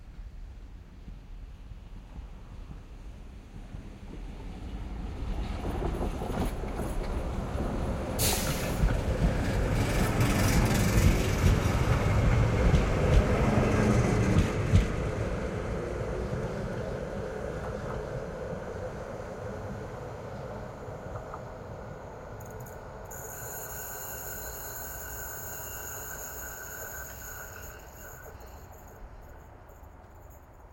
trikk passerer v-h
a, binaural, left, oslo, passing, recording, right, tram